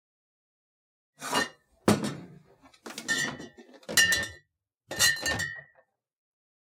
Bottles Multiple Clinking
Beer bottles being jostled and clinking. Recorded with Sennheiser 416 on Tascam DR-680.
clink, clinking, bottles, bottle